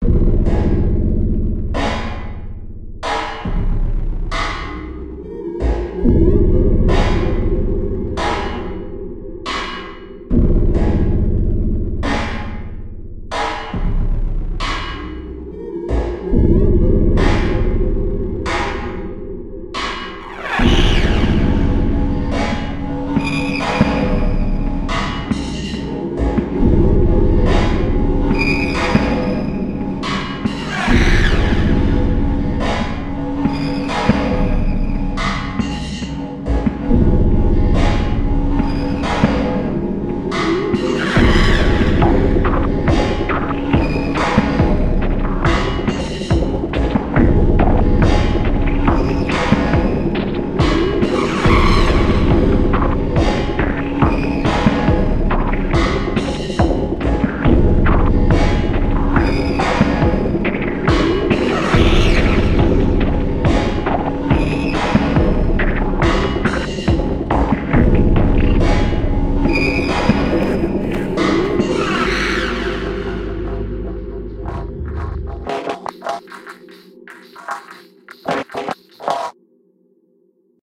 abstract dark ambience beat
Old sound I uploaded years ago and never described until now.
Software made, using Addictive Drums with a lot of reverb effects, subatomic VST, Gort's Minipiano Soundfont.
plugins used: CamelPhat3, CamelSpace, black_box, Bouncing ball delay, Ambience, and a bunch of stock FL Studio reverbs, EQ's and delays.
thrill, ugly, ambient, terror, corridor, mysterious, sinister, dark, creepy, weird, spooky, haunted, suspense